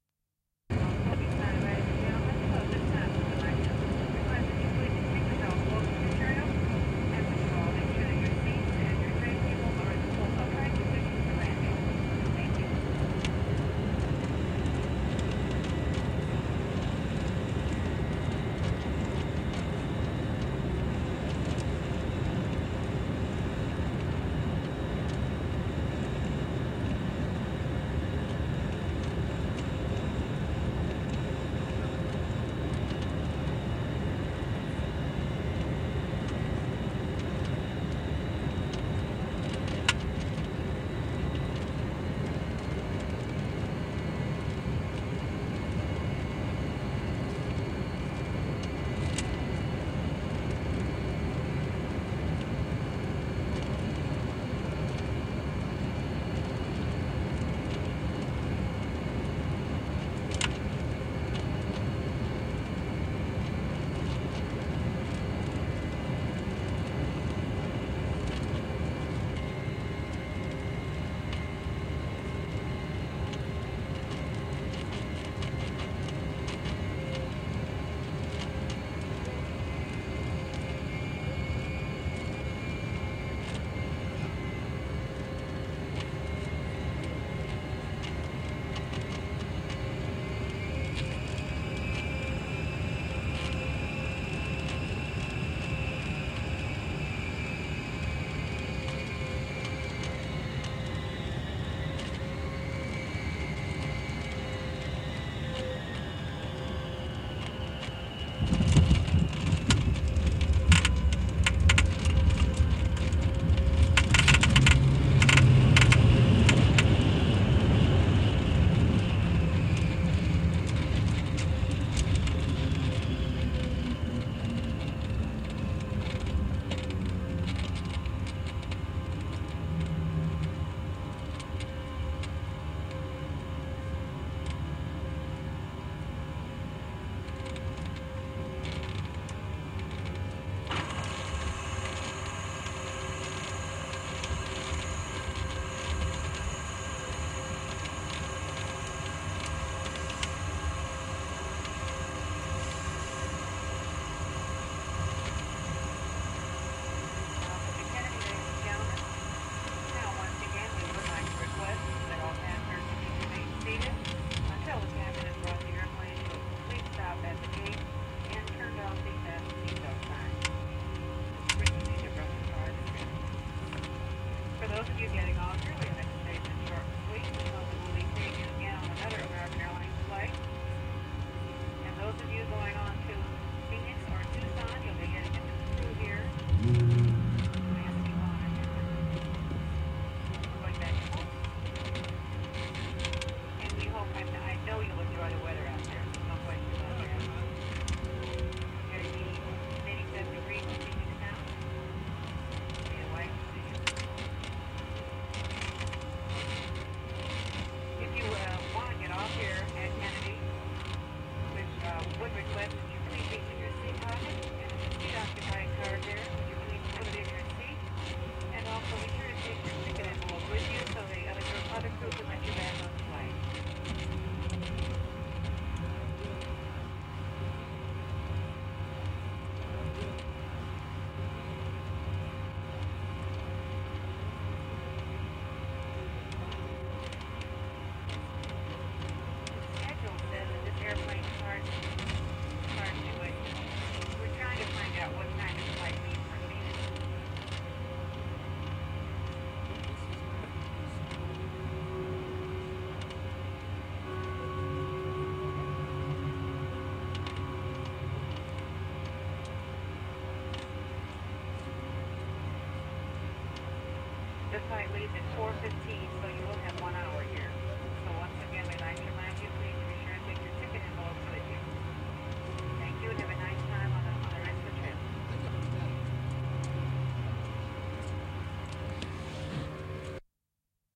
Boeing 707 landing // laskeutuminen
American Airlines Boeing 707 landing to JFK. Recorded at the aircraft cabin
Laskeutuminen, jymähdys kiitoradalle, kolinoita, kuulutus, American Airlinesin Boeing 707 laskeutuu New Yorkiin JFK:n lentokentälle.
Date/aika: 30.1.1976